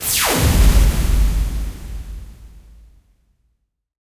Same as LaserRocket, with more bass. Created with Adobe Audition. See LaserRocket for more info
alien, explosion, future, synthetic